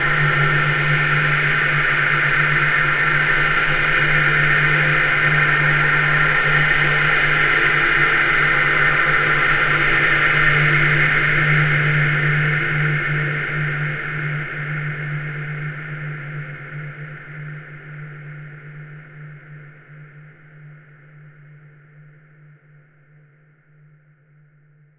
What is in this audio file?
Light and beauty from deep space. Created using Metaphysical Function from Native Instrument's Reaktor and lots of reverb (SIR & Classic Reverb from my Powercore firewire) within Cubase SX. Normalised.
ambient, deep, drone, soundscape, space